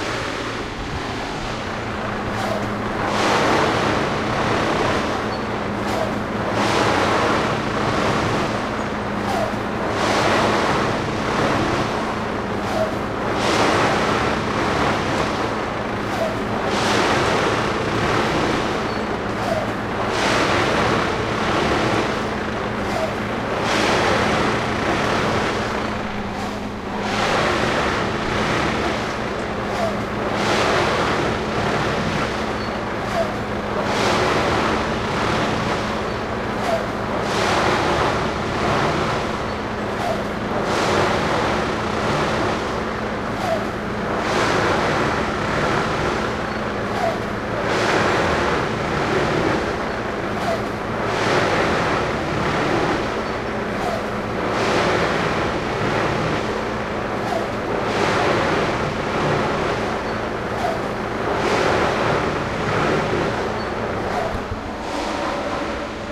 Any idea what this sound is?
RingbahnWirdRepariert Sound5
field recording construction side train track bed industrial agressive massive hard
massive, agressive, hard, industrial